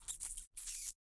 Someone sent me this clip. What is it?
Cartoon Mouse
A cartoon type mouse / mice sound I made with my mouth.
Game, mice, Cartoon, mouse